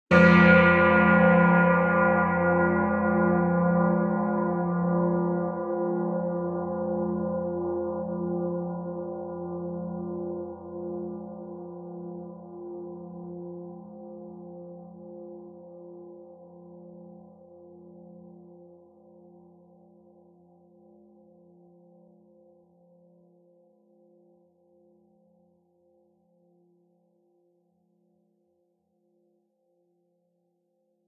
A recording of a deep, low frequency bell.